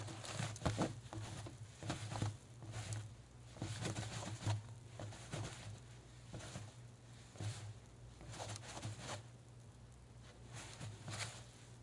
Wash Glass 3 FF684
glass, washing glass, washing
washing-glass, glass